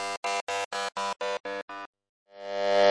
Space Lead
little lead part of a track of mine.
visit me on SC
FX, Hitech, Lead, Psychedelic, Space, Synthesizer